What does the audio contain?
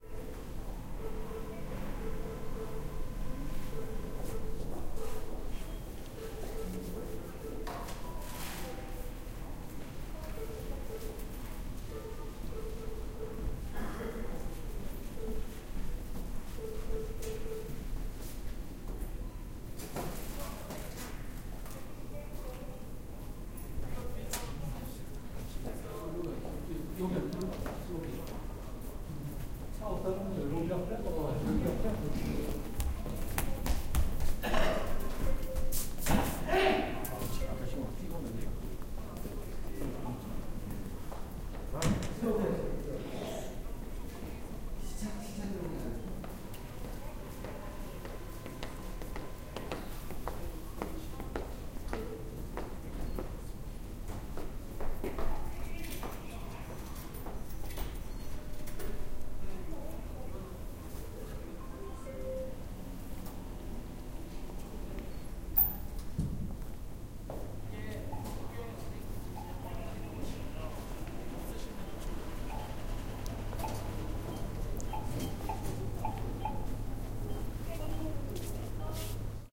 0082 Bank ATM
In the hall of the bank. Sound from the ATM. People walking and talking
20120116
ATM, bank, field-recording, footsteps, korea, korean, seoul, spit, voice